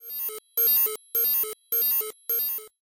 Alert! alert! alert!
Sounds like a security breach alarm sound.
Created using Chiptone by clicking the randomize button.
Ringing, sounddesign, sfx, scifi, fx, game, strange, sci-fi, sound, pinball, electric, electronic, 8bit, soundeffect, machine, abstract, future, Video, effect, arcade, digital, Video-Game, 8-bit, retro, noise, alarm